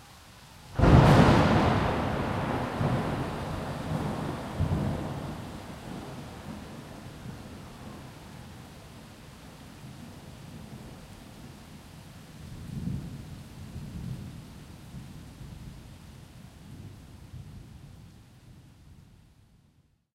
NYC Rain 4 A- lightning crack
NYC Rain Storm; Some traffic noise in background. Rain on street, plants, exterior home. Large Thunder, siren.